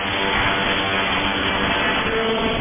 This sound was recorded from the Twente University online radio receiver.
Raw and unprocessed. Just as it is, it is a bit harsh as a drone, but I thought I could use it as raw material to create drone sounds.
Recorded from the Twente University online radio receiver.
radio, online-radio-receiver, Twente-University, short-wave, hiss, static, shortwave, noise, humm, interference, drone, am